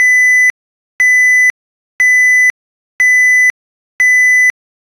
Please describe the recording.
2000 Hz beeps
artificial, alarm, beep